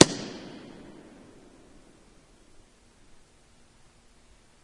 Closing book in church 2
The sound of a book being closed in church.
From old recordings I made for a project, atleast ten years old. Can't remember the microphone used but I think it was some stereo model by Audio Technica, recorded onto DAT-tape.
book, church, reverb, close